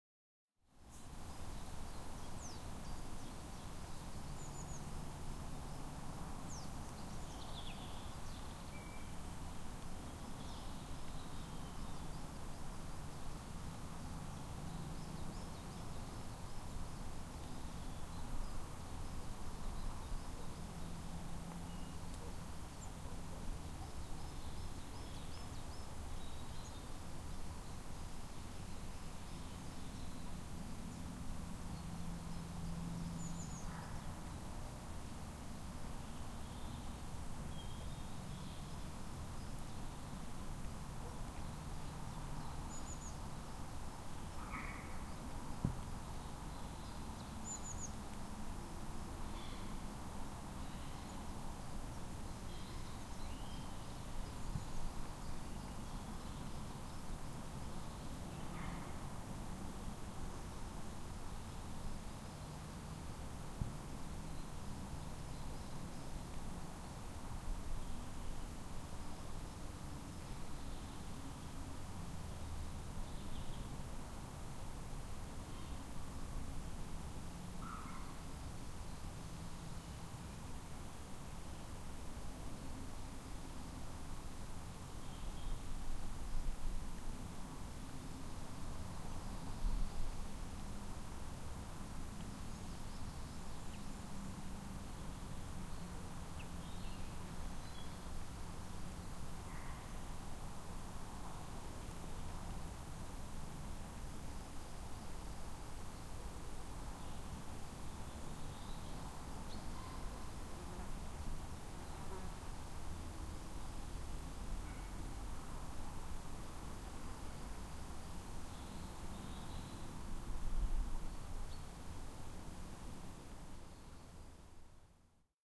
A spring day in late March 2008 at Skipwith Common, Yorkshire, England. General woodland sounds including the hiss of breeze in the trees and distant traffic. The thin call of the long tailed tit can be heard. I have found that these beautiful tiny birds will come very close if you sit very still which of course one has to do when recording.